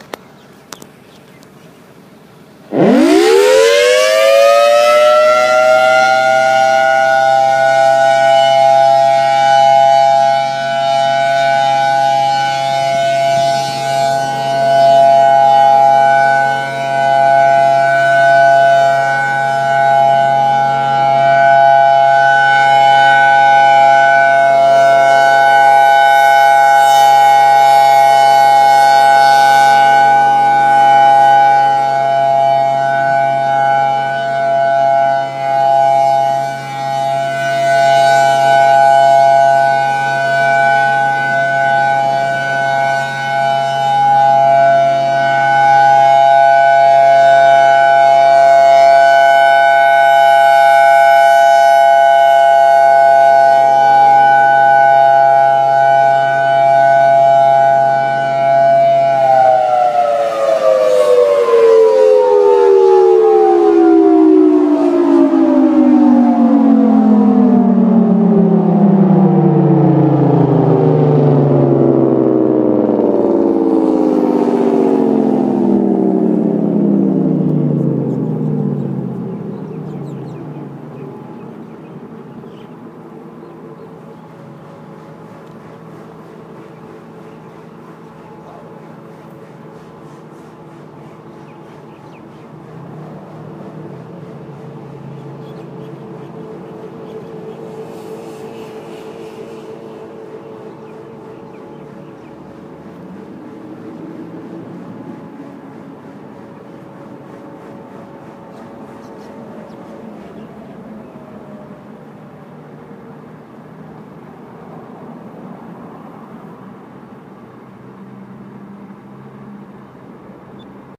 Thunderbolt 1000AT Waikiki Public Library
Honolulu, Hawaii. Thunderbolt 1000AT near the Waikiki Public Library. Wednesday July 1, 2015 at 11:45 AM. Siren is set on chopper level 7. Can faintly hear a Modulator 3012 wind down in the background.